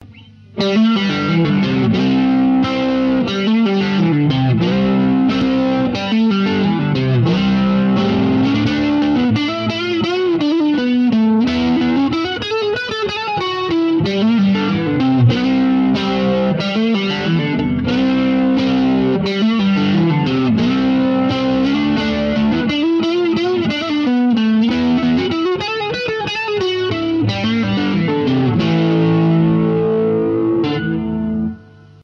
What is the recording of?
Key in A.
tempo - 90 bpm.
Short rock instrumental study.
west, distortion, rock, overdrive, instrumental, Chords, rythum, guitar, distorted, wild, electricguitar, desert, western